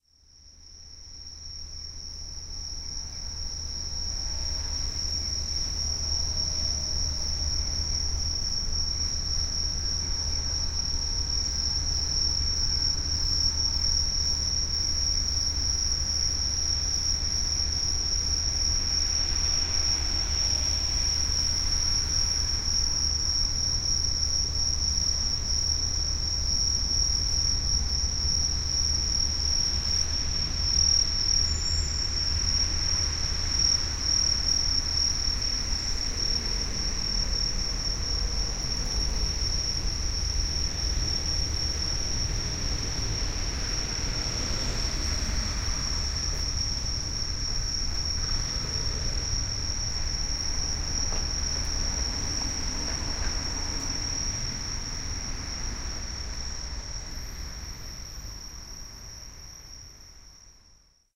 The sound of cicada in the trees after dusk outside of Zaim art lab, Yokohama, Japan.
cicada, insect, japan, nature, trill